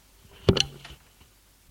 Unintentional noise collected editing audiobooks home-recorded by voluntary readers on tape. digitized at 22khz.
glitch, tape-recorder, footage, noise